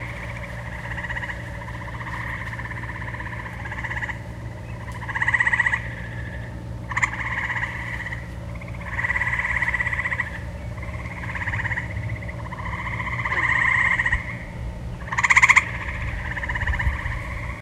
Sound of lake birds.